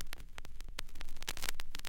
In an attempt to add warmth to my productions, I sampled some of the more distinctive sounds mostly from the lead-ins and lead-outs from dirty/scratched records.
If shortened, they make for interesting _analog_ glitch noises.